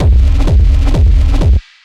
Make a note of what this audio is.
Techno Kick 2
Kick related low end frequencies for your sample or sound design creations.Part of the Techno Experimental Pack
bass, bass-drum, bassdrum, bd, beat, distorted, distortion, drum, experimental, free, hard, hardcore, kick, kickdrum, percussion, sample, techno, thud